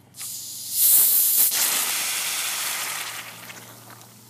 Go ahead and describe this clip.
open 24oz soda bottle outdoors long fizz explode
Shook and Opened a 24 bottle of ginger ale and recorded outside with low street noise, I shook up the bottle so it would explode and fizz all over.
fizz, gas